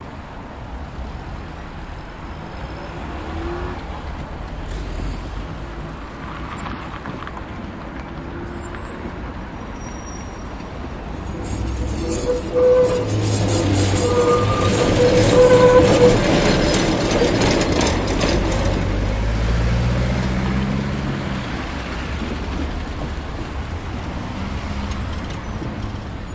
tram at veering 4-creak
Streetcar at veering with loud characteristic creak.
Recorded: 2012-10-13.
cars, city, creak, crossroad, noise, rumble, streetcar, tram